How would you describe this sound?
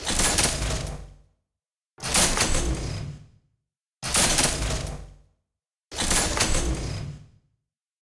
LATCH (Metallic)
Metallic "latching" sounds
machine, metal